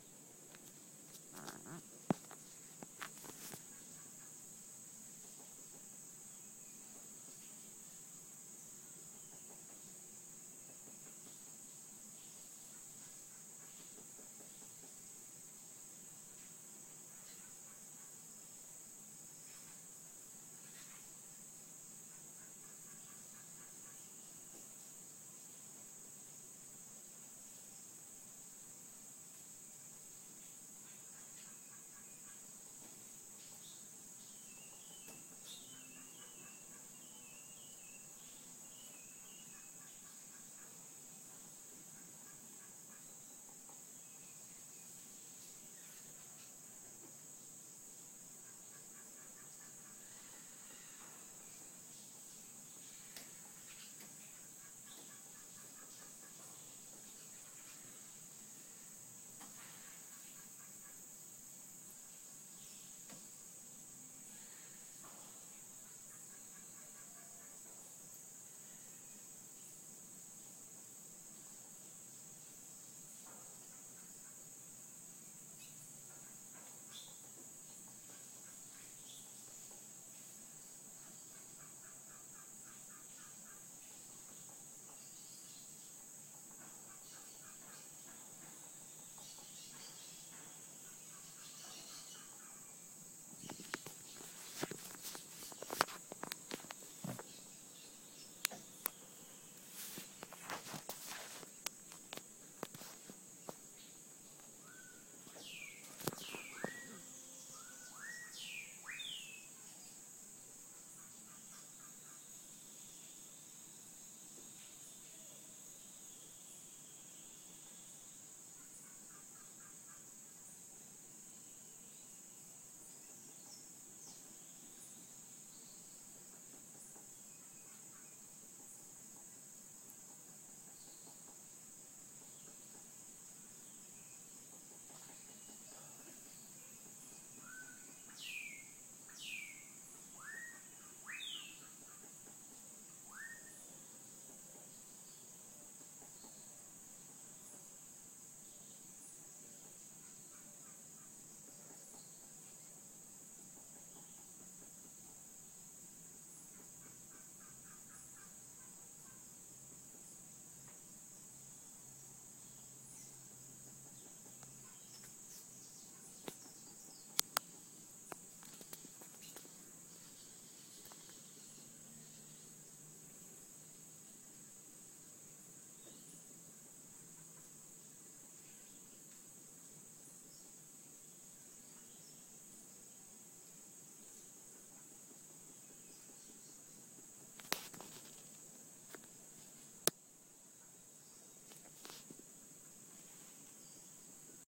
iPhone recording from Costa Rica of the forest, with insects buzzing, birds chirping, etc.